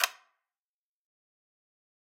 Button plastic 4/4
A Click of an old casetterecorder.
Could be use as an sound for a menu or just sounddesign.
Hit me up for individual soundesign for movies or games.
game, home, video, Tape, Casette, foley, horror, trash, Machine, sounddesign, menu, Button, Click, Press, effect, plastic